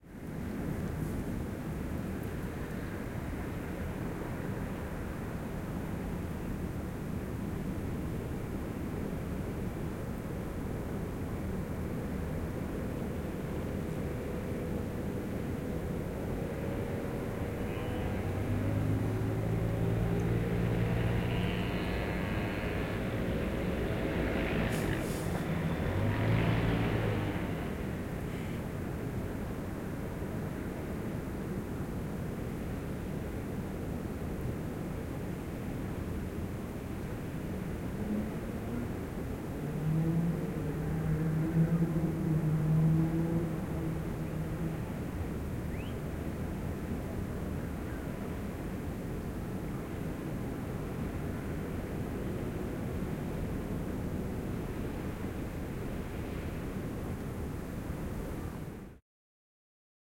ambience shore village
Ambience. Some seabirds, the shore and a distant road with a passing truck and motorbikes. Captured on a hill nearby coast and village with zoom H4n. Normalized/render in Reaper.
field-recording, coast, ambience, traffic